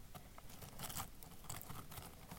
Short potpourris rustling sound made by stirring a bowl of it
scrunch, crunch, crackle, rustle, potpourris